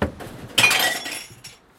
Sound Description: Jemand wirft eine Flasche in einen Glascontainer - throwing a bottle into a bottle bank
Recording Device: Zoom H2next with XY-capsule
Location: Universität zu Köln, Humanwissenschaftliche Fakultät, Ecke Gronewald Straße und Frangenheimstraße
Lat: 50.933063
Lon: 6.919353
Date Recorded: 2014-11-25
Recorded by: Timea Palotas and edited by: Alexandra Oepen
This recording was created during the seminar "Gestaltung auditiver Medien" (WS 2014/2015) Intermedia, Bachelor of Arts, University of Cologne.